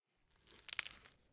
Fire Crackle 01
Fire Crackle Sound
flase, fire